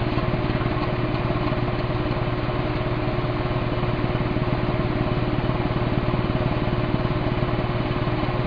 Airplane/Tank Engine Sound
This here is the sound of either a tank or aircraft engine I recorded for the game Aces High II.
aircraft, engine, tank, vehicle